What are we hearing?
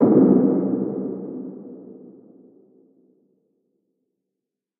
A stab sound that has been put through a reverb unit in logic.
stab, Free, synthesiser, synthesised, Atmosphere